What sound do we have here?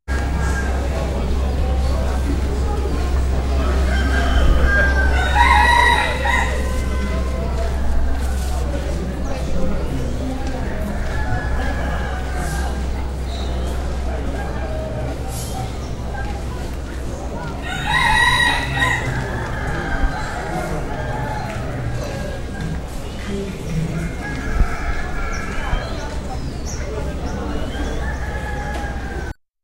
Recorded in Bangkok, Chiang Mai, KaPhangan, Thathon, Mae Salong ... with a microphone on minidisc